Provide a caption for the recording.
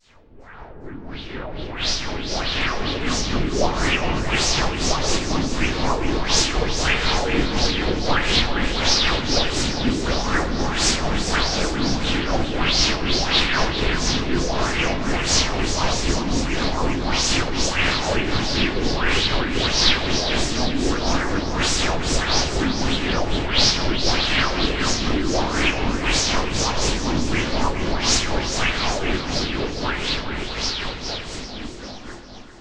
Noise Cache 2
Another weird sound made by "wah wah-ing" and echoing Audacity's noise choices.
horror, space, psychic, noise, weird